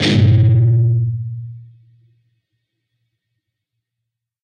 Dist Chr Gmin up pm
E (6th) string 3rd fret, A (5th) string 1st fret, and D (4th) string, open. Up strum. Palm mute.
chords, distorted, distorted-guitar, distortion, guitar, guitar-chords, rhythm, rhythm-guitar